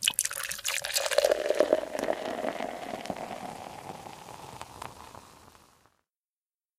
Water Pouring
Stream-Water, Water